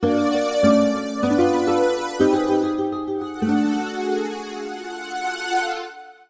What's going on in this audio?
A six-second suspenseful chord progression.